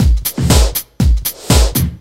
duppyReverseSnare 120bpm
Pop funk style beat with a reversed snare drum
120bpm, beat, break, breakbeat, drum, funk, loop, reverse, reversed, snare